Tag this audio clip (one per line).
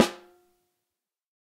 velocity
steel
13x3